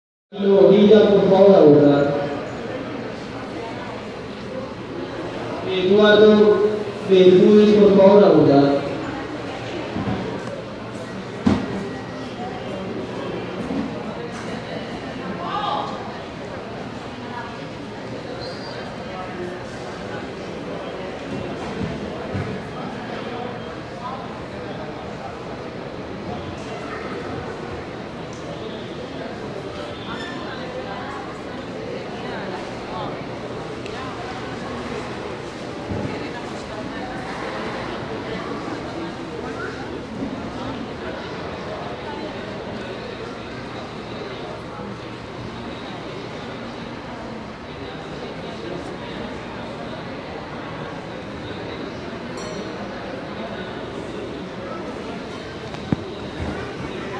Terminal transporte en bogotá - Bogota Transport Terminal - Ambient
ruido, transporte